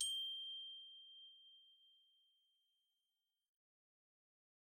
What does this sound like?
One-shot from Versilian Studios Chamber Orchestra 2: Community Edition sampling project.
Instrument family: Percussion
Instrument: Glockenspiel
Note: F#6
Midi note: 91
Midi velocity (center): 63
Room type: Band Rehearsal Room
Microphone: 2x SM-57 spaced pair, 1x AKG Pro 37 Overhead
glockenspiel, multisample, midi-note-91, single-note, percussion, fsharp6, vsco-2, midi-velocity-63